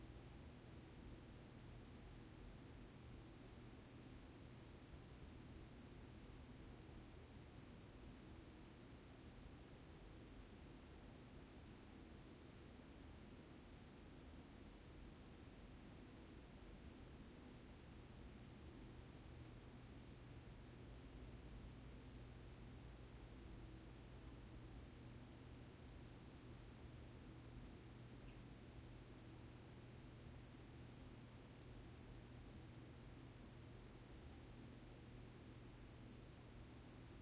Apartment, small quiet bathroom
Roomtone, small full bathroom in a quiet apartment
bathroom, Roomtone, quiet, apartment, small